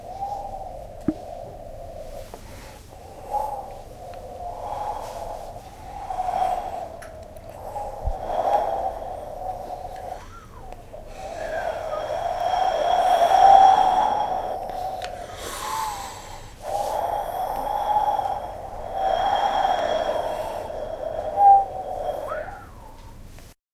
Vent polaire
Polar wind sound made with mouth blowing into hollow objects.
Polar, mouth, blowing, wind